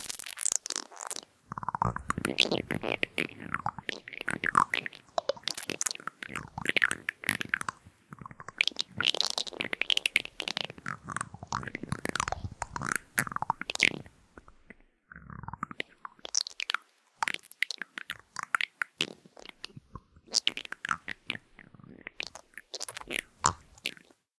kindersurprise frequency
This is the same sample as 'kindersurprise', but the playback frequency was changed during playback to give this strange effect.
effect, experimental